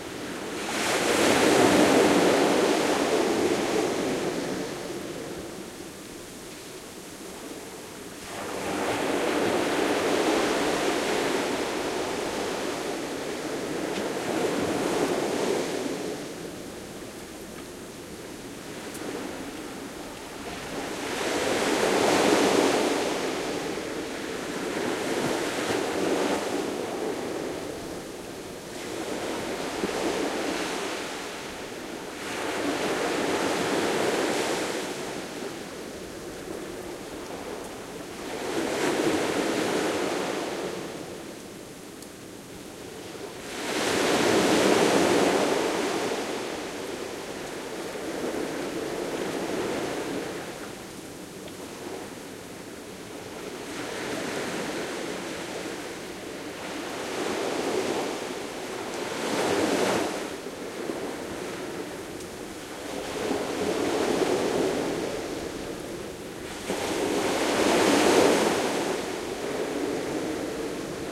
yet another farther take of sea waves crushing on the sandy beach of Sanlucar de Barrameda, Cadiz (S Spain). Olympus LS10 internal mics, protected from wind with my shirt.